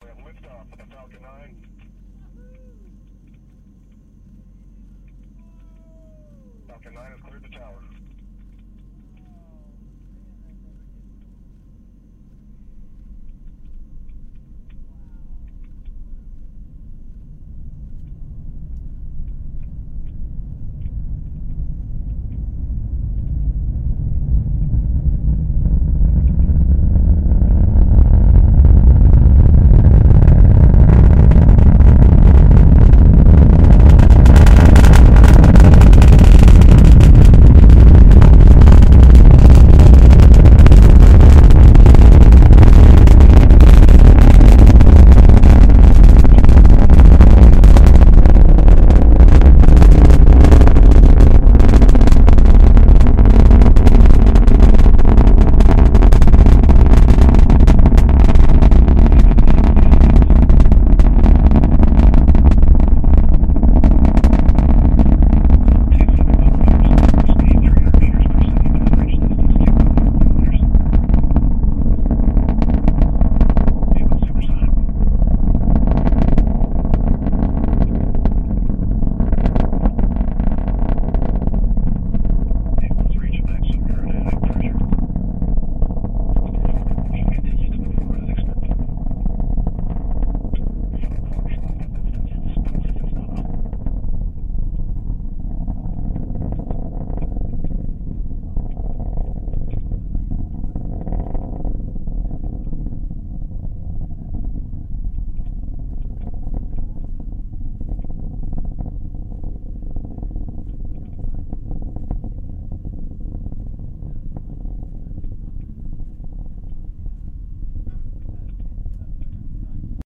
Space X -Falcon 9
Recorded live at Cape Canaveral AFB, Florida. 09/07/2014 1:00AM in the media viewing area. This is as close as you can get to a live launch approx. one mile.
The mic was placed on the front seat of the news truck in order to cut out any other outside noise. You are hearing the real sound of the powerful new Space X Falcon 9 rocket with over 1.3 million pounds of thrust at lift off. Even close to launch pad 40 it takes the full sound a few second to reach the mic.
This was the launch of AsiaSat6, a high speed communications satellite that will cover a large swath of Southeast Asia for broadcasters such as Thaicom.
engine, Falcon, 9, live, X, Spase, Adobe, power, field-recording, rocket, Merlin, Audition, usb, recorded, talking, shaking, rumble, tremendous, powerful, launch, Space4, earth, announcer, mic, engines, yeti